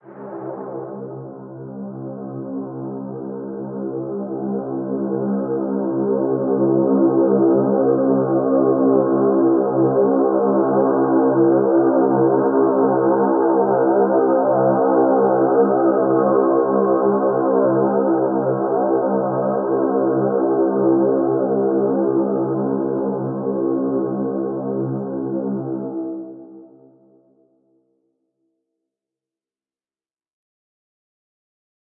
A combination of synths and effects which have been run through a reverb unit in Logic